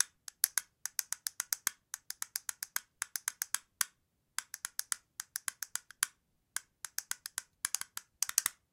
One-shot from Versilian Studios Chamber Orchestra 2: Community Edition sampling project.
Instrument family: Miscellania
Instrument: spoonsun
Location: Quebec, Canada
Room type: Small Studio
Microphone: 1x SM-57 close
Performer: Sam Hebert
fx, miscellania, one-shot, spoonsun, vsco-2